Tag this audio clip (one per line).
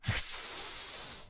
animal
hissing